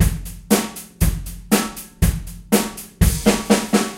Rock beat loop 6 - straight 2 bars
Drum beat inspired by ZZ Top beats. Not to advanced, but useful...
Recorded using a SONY condenser mic and an iRiver H340.